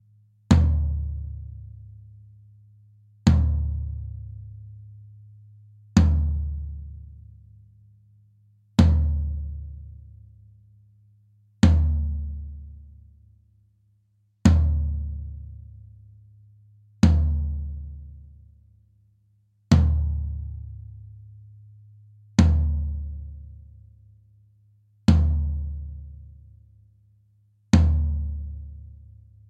Ganon Low Tom Drum
Low tom drum hit with ringing EQ'd out
drums, tom, percussion, hit, drum